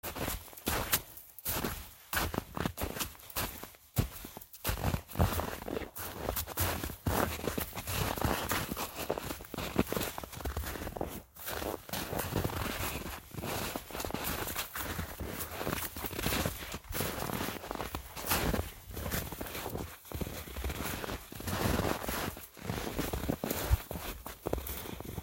Walking through ankle deep snow.
crunch, footstep, step, walk, walking